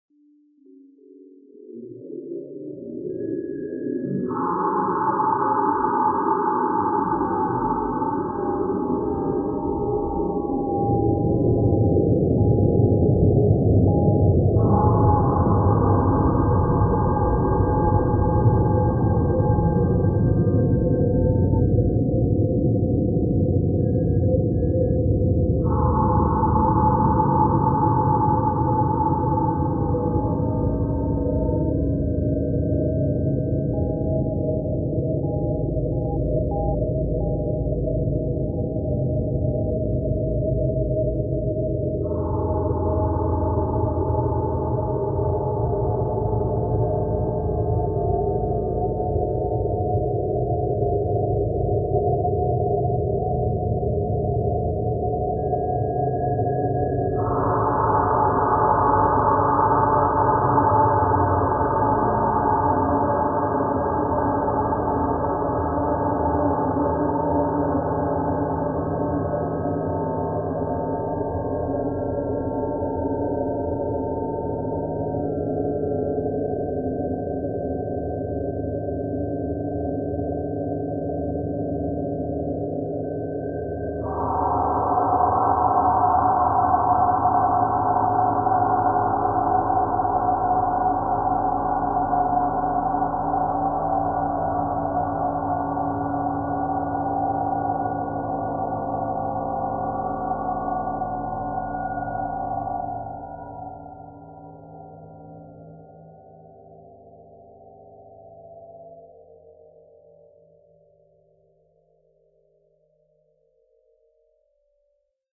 scream spear submarine2a
The scream male_Thijs_loud_scream was processed in Spear, creating a submarine-like underwater sound.